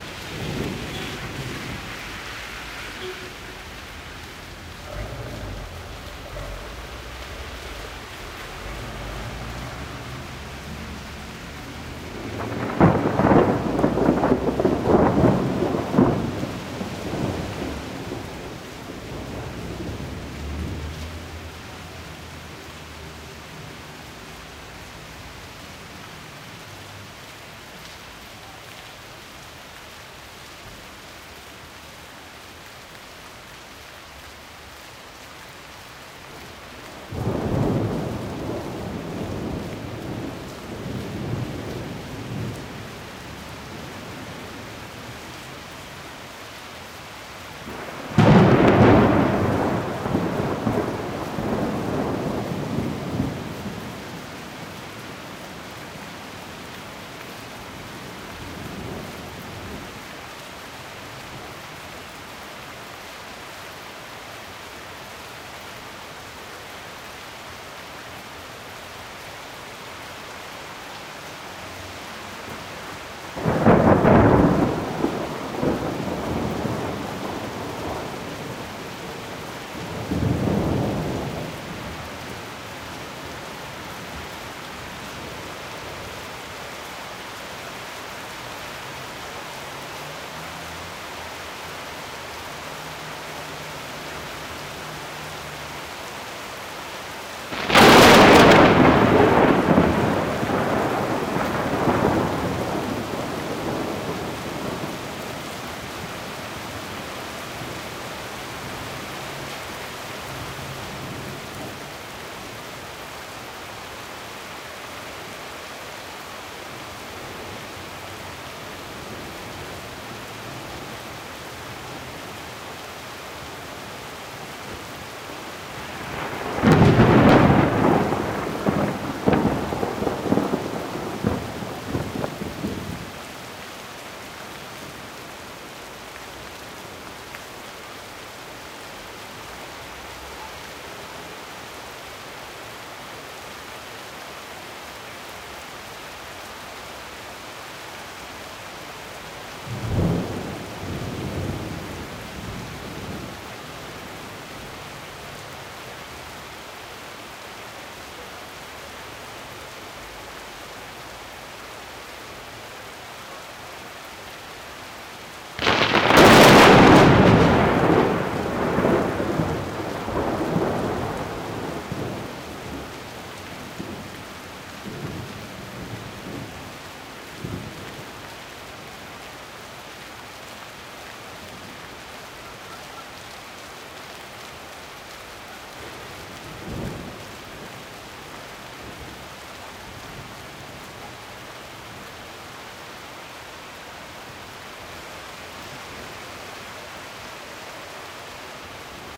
rain with thunders
nature, rain, thunder, weather